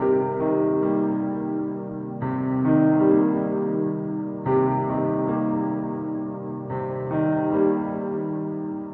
s piano loop 1
My record-tapeish Casio synth’s piano one more time! And it loops perfectly.
piano, calm, loop, tape, phrase, reverb